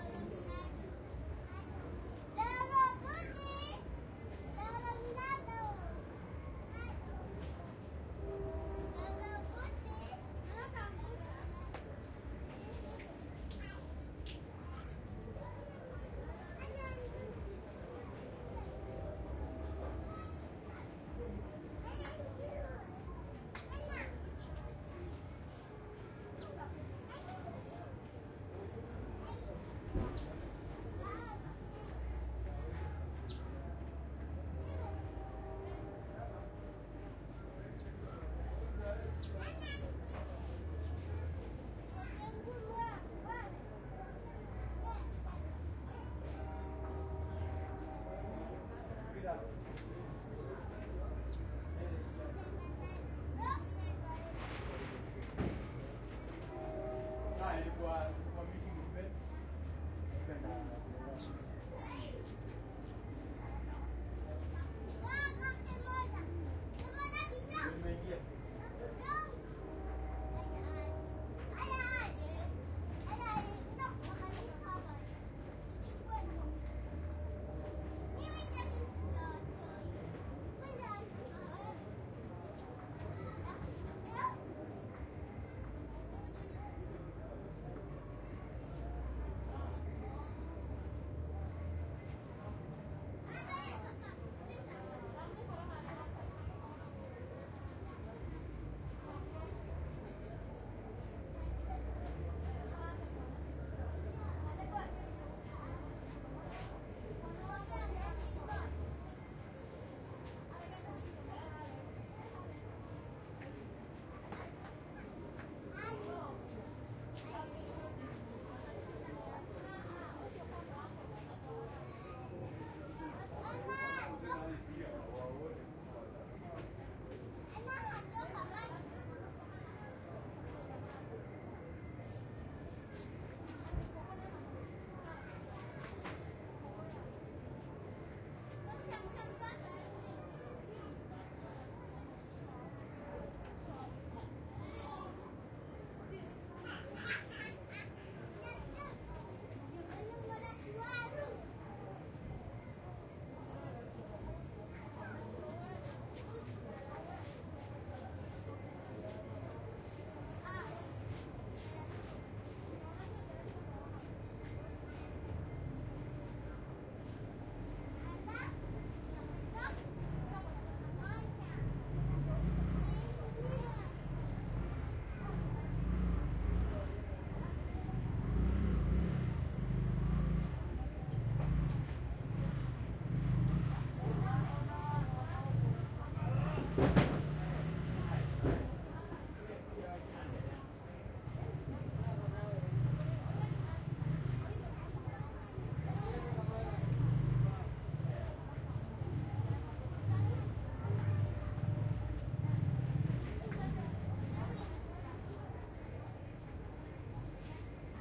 In the Slums of Nairobi , voices ,children radios.
Schoeps stéréo ORTF